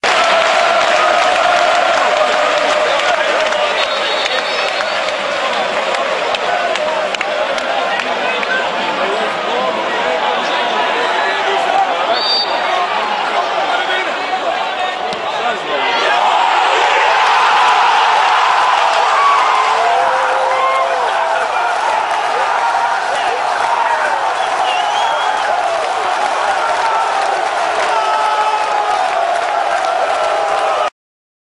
football score
A score in a soccer match
score, soccer